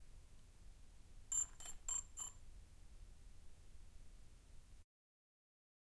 FXLM drone quadrocopter startup clicks close T01 xy
Quadrocopter recorded in a TV studio. Zoom H6 XY mics.